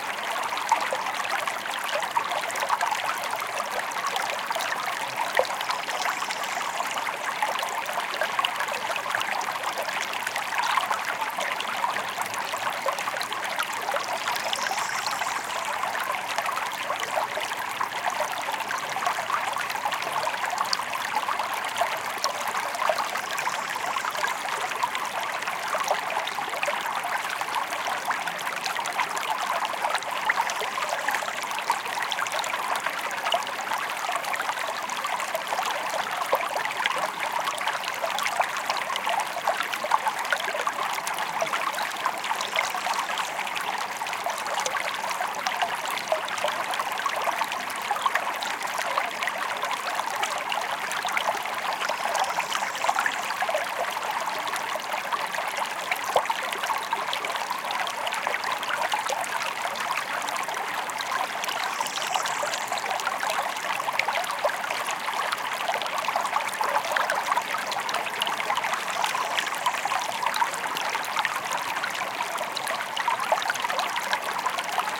Noise of a water stream. Primo EM172 capsules into FEL Microphone Amplifier BMA2, PCM-M10 recorder. Recorded near Villareal de San Carlos (Caceres, Spain)
river, field-recording, creek, stream, water, flowing, nature, brook, babbling